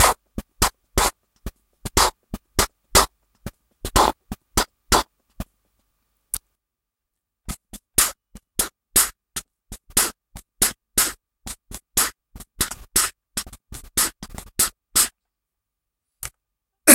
Clap 3 mono
A lofi beatboxed clap sound.
mono, beatboxing, lofi, clap